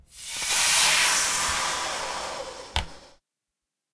Balloon-Inflate-29-Strain
Balloon inflating while straining it. Recorded with Zoom H4
balloon, strain